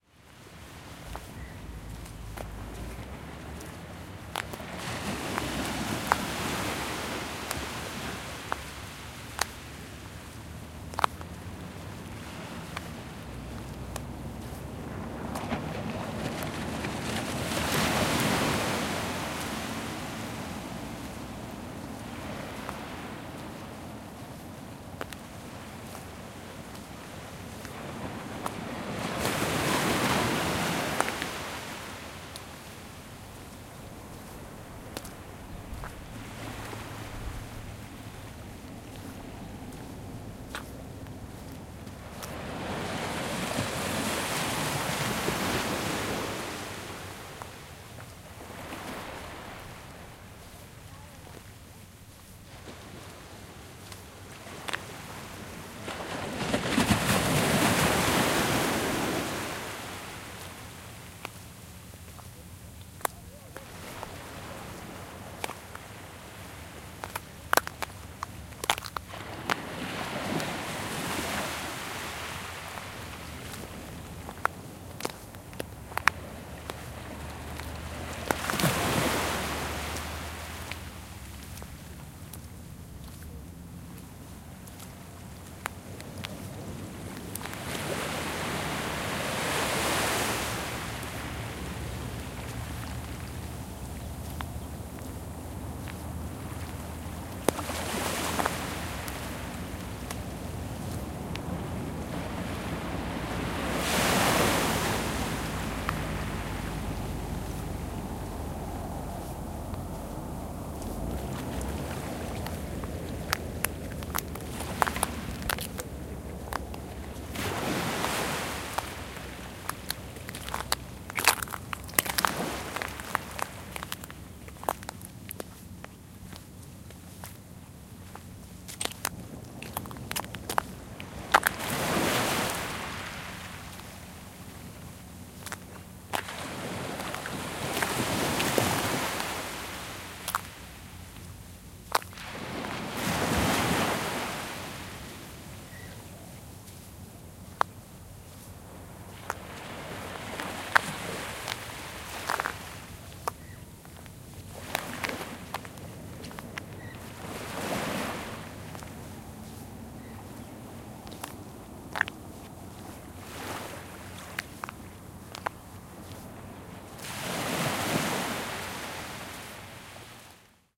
Beach, sand/shells: Walking
Walking on a sand beach, low tide, many small shells underfoot.
Stereo XY-coincident pair @ 44k1Hz.
beach, sand, shells, walking, waves